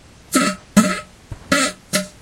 toilet fart 60
fart, flatulation, flatulence, gas, poot